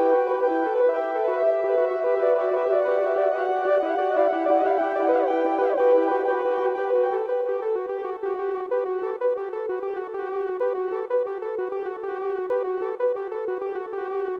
portamento-MIX
Synth sound created in ICHI. 124 bpm
These loops were created for a track which was a collab with AlienXXX for the Thalamus Lab 'Open collaboration for the creation of an album'
This sample was created by AlienXXX who used audacity to tranform the original samples into this beautiful mix.